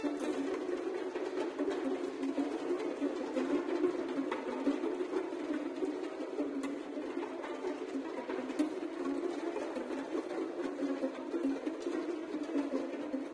A creepy violin sound, loopable.